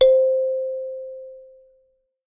Kalimba thumb piano - middle C note